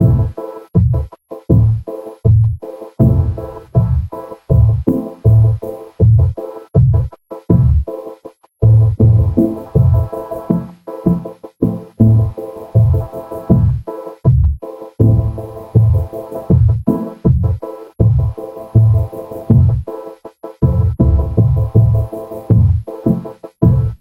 This is a drumloop at 80 BPM which was created using Cubase SX and the Waldorf Attack VST drumsynth.
I used the acoustic kit preset and modified some of the sounds.
Afterwards I added some compression on some sounds and mangled the
whole loop using the spectumworx plugin. This gave this loop a low frequency vocoded sound.